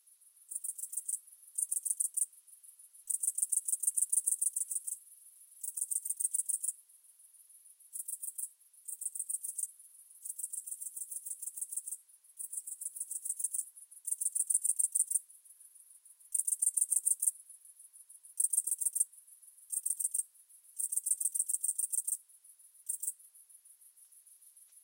Very strong sounding grasshopper. When I rode home from work at 11 a.m. I heard a strong sounding grasshopper in a neighbor's busch I run into my house and picked up my recorder and mic and run out tried coming closest possible for good sound, but it was a little too close because the hopper become silent.
But this is recorded before that :)
Eqipment used;
Recorder Zoom H4n pro
Microphone Sennheiser shotgun MKE 600
Rycote Classic-softie windscreen
Software Wavelab
general-noise, grasshopper, insects, mke, garden, bushes, grasshoppers, forest, h4n, ambiance, nature, ambient, sennheiser, field-recording, ambience, 600, zoom, summer